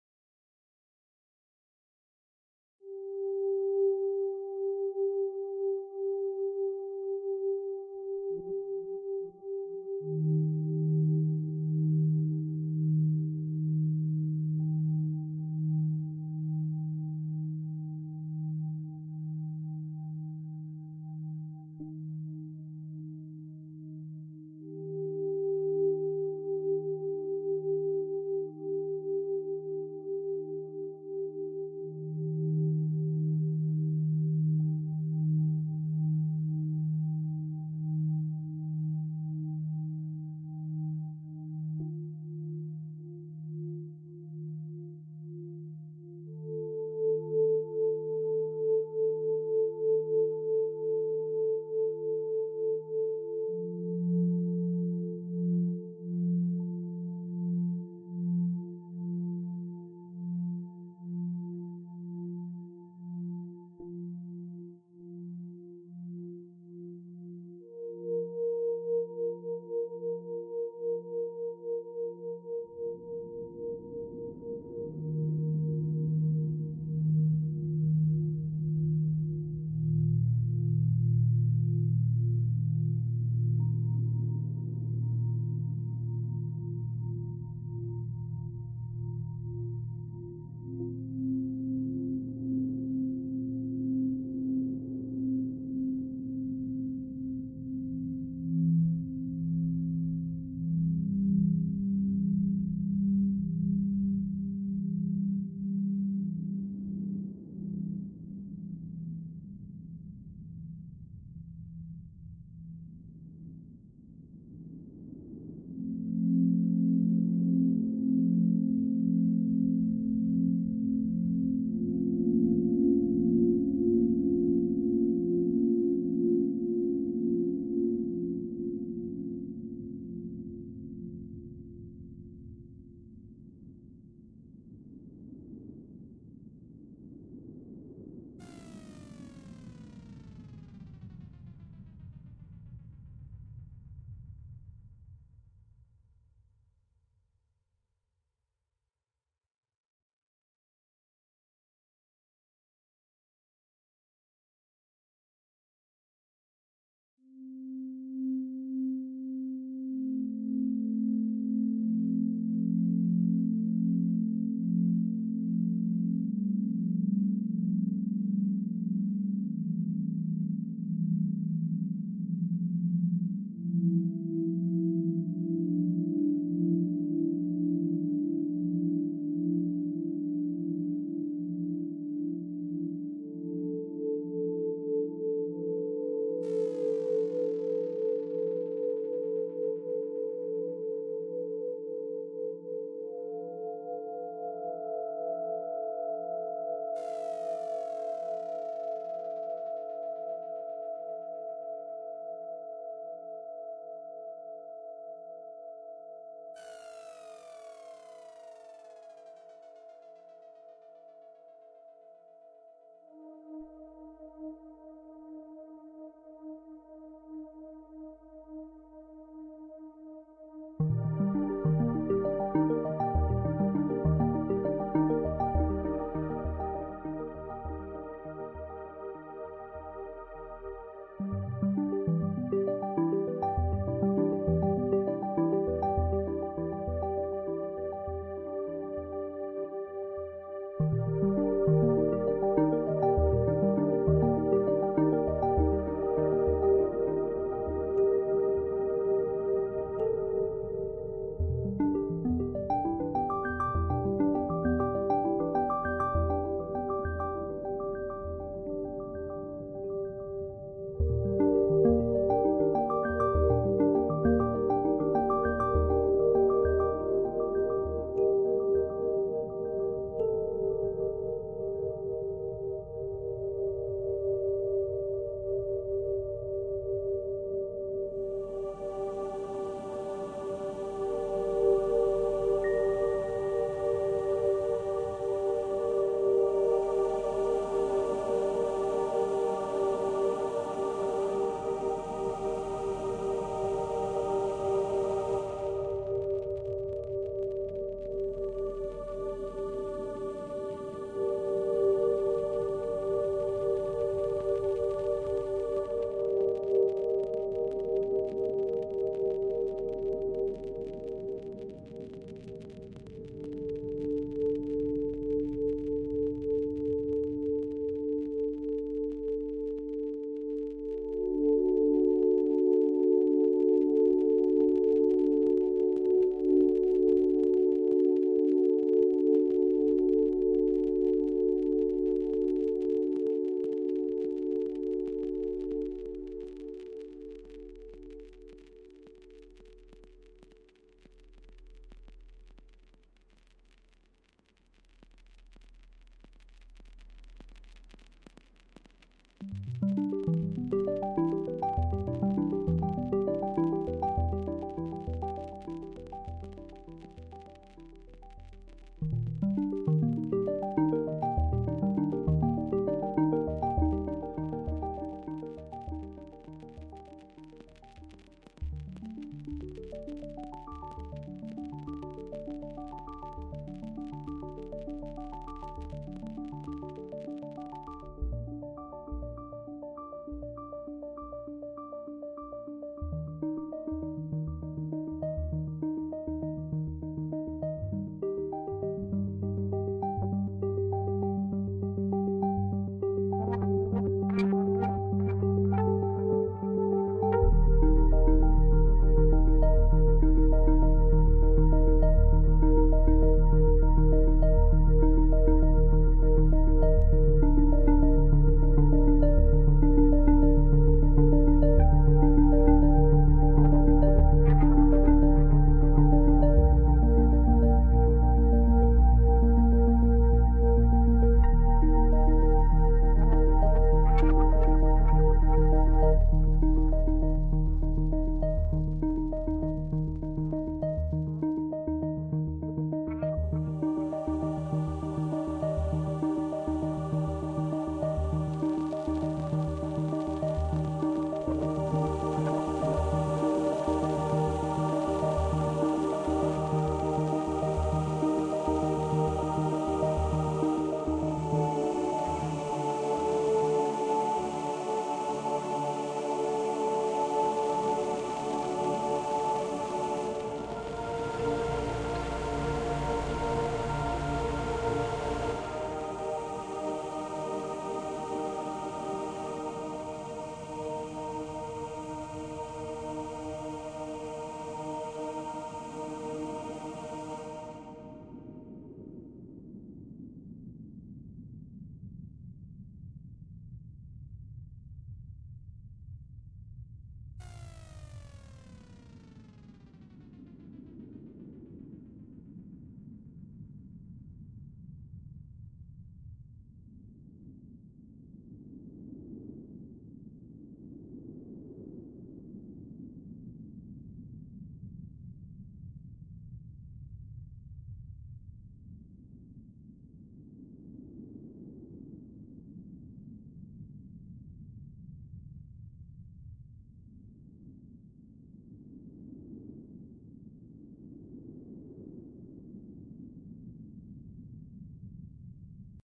Relaxation music with occasional soothing sounds effects. For mood setting e.g. nature, sensuality, space, other-worldliness, escape, dreams.